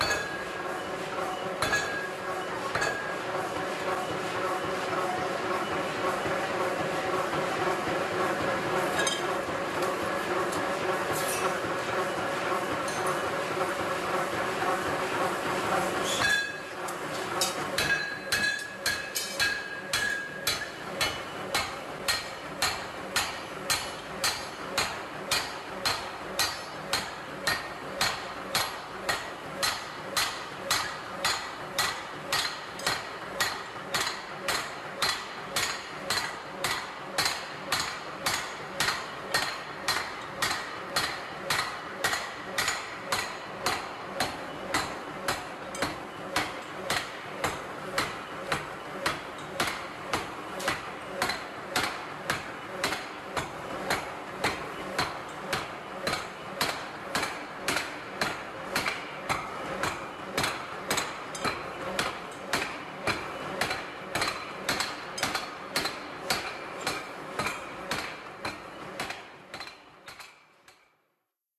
Recorded in a steel factory with a brandless digital VR.